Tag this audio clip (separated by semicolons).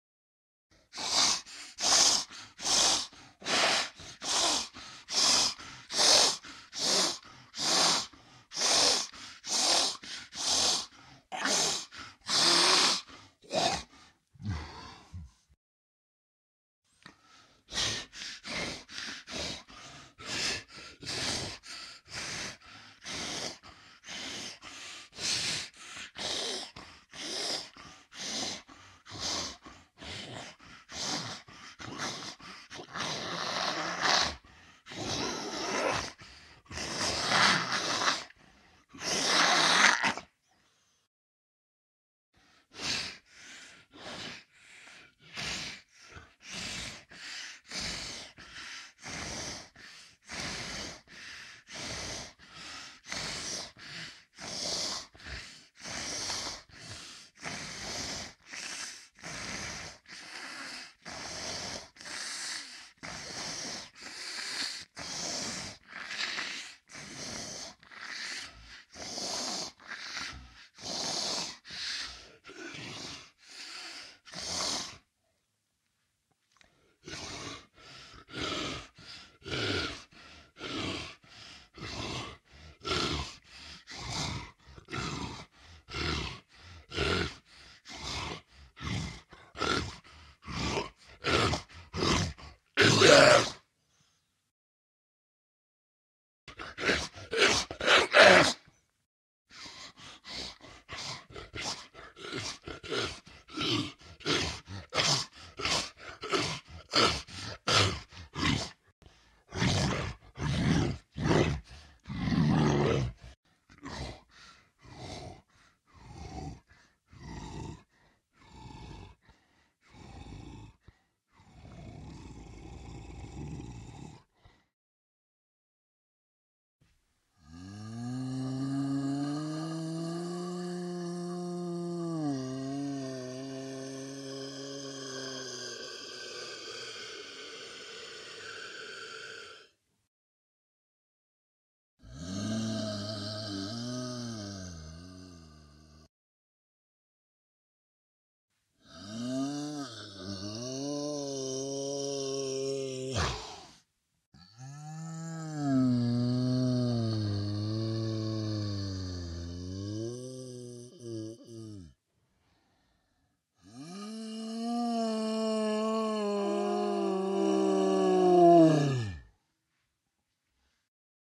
creature; moan; undead; growl; zombie; horror; beast; roar; monster